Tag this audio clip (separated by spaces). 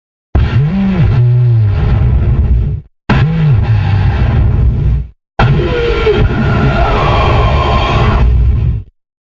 breath breathing dance dark distortion effect electro electronic game gasping gritty hardcore horror industrial male noise porn-core processed random rave resonance sci-fi sigh sound synthesizer techno unique vocal